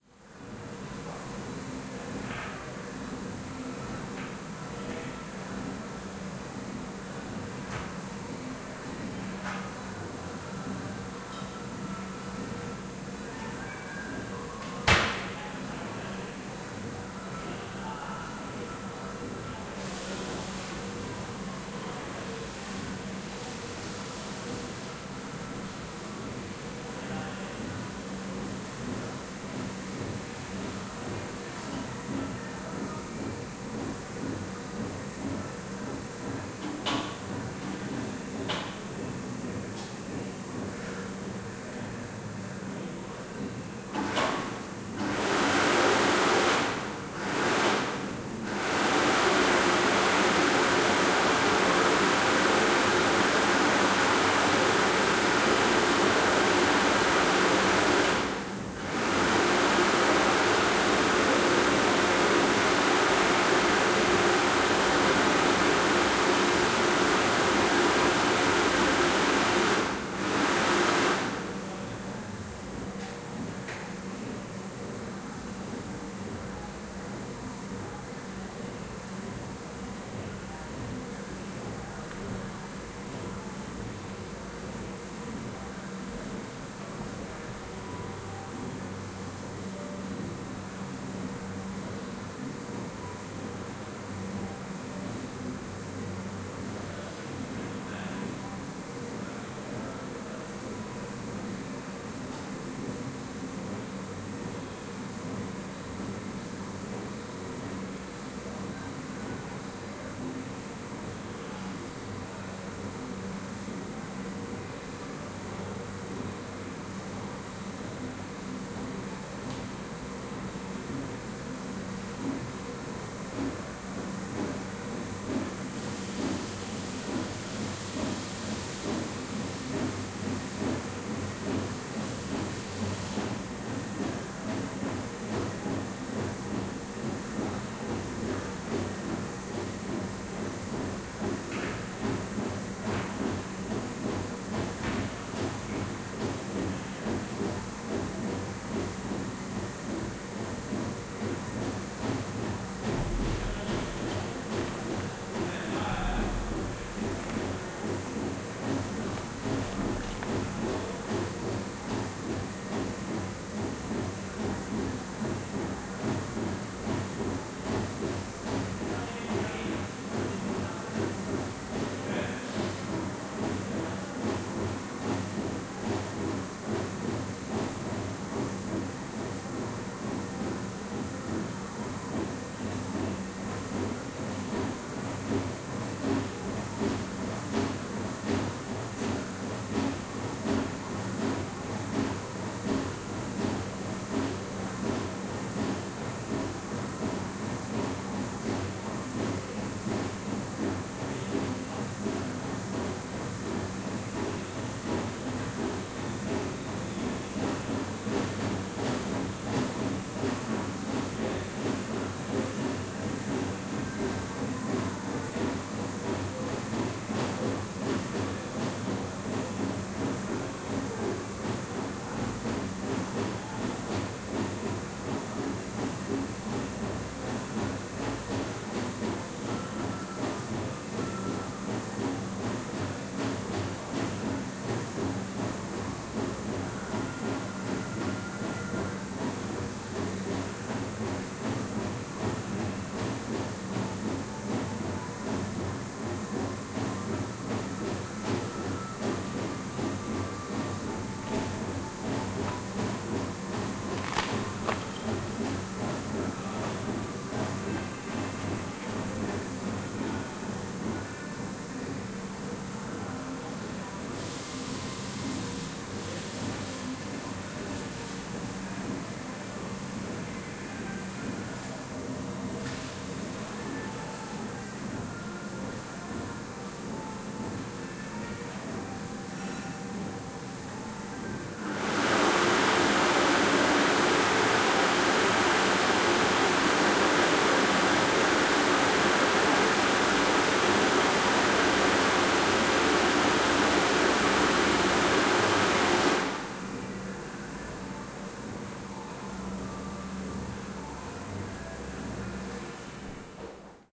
Bathroom gym
Sound of a bathroom into the gym
bathroom, flush, gym, toilet, water